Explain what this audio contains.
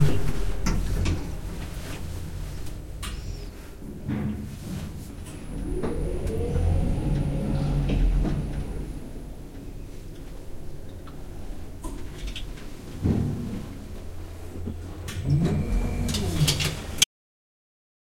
Old Lift Open Close travel down
An old lift door opening and closing and lift goes down 2 floors.